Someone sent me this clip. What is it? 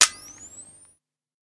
one use camera clic with flash
click with flash in background of a one use camera.recorded with sm 58 mic in mackie vlz and tascam da 40 dat.
camera, clic, click